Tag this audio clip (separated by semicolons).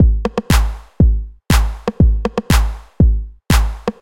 acid; beat; drummachine; groove; house; rhythm; Roland; techno; TR-909